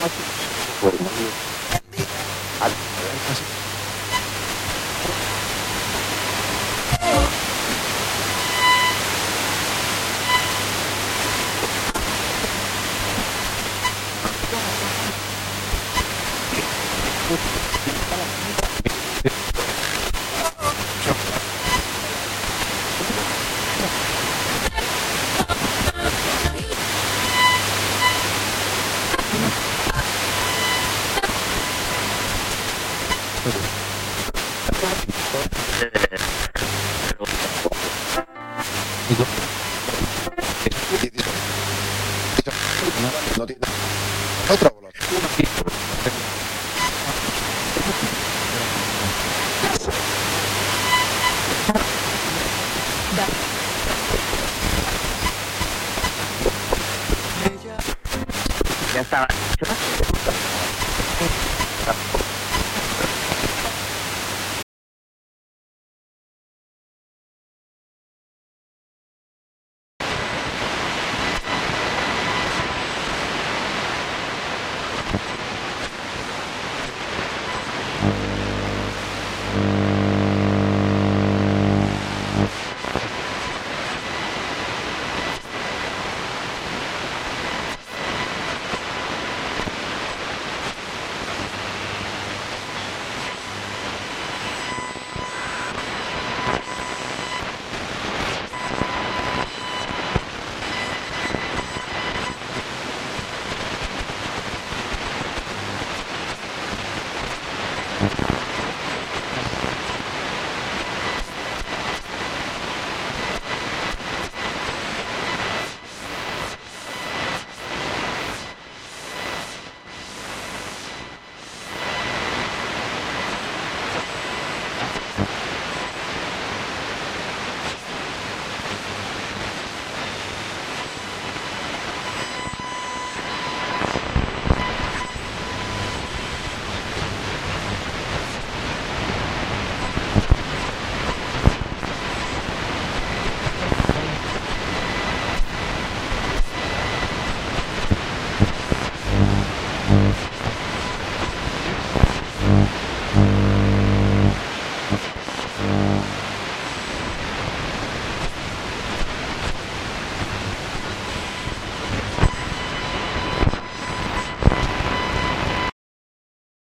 FM/AM Radio noise ruido
FM and AM Radio noises recorded directly from a 90s radio. First part is FM and second is AM.
Interface: Tascam US-122
Software: Reaper
fm, noise, noisy, radio, ruido, sintonizando, sintonizar, tuning